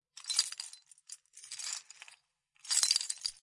Sonido de un llavero
agitar, Llavero, llaves